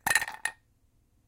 Quiet sounds of ice cubes being dropped into a glass.